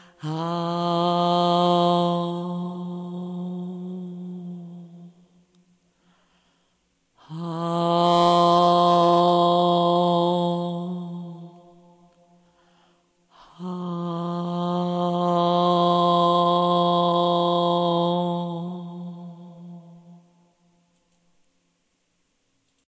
Vocal sample human voice